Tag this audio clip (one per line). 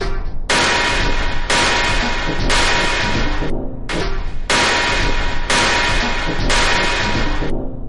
factory
industrial
plant
machine
machinery
office
sfx
print
loop